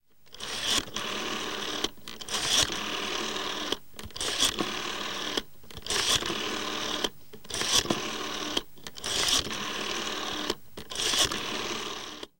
telephone 70s circle dial dialing recorded using a Sennheiser wireless dynamic EW135G2 microphone through a Yamaha DM1000 digital console with Cubase software